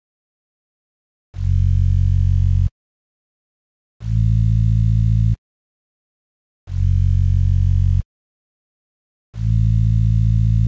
bass i made for jelly makes me happy
bass, bassline, sub, sub-bass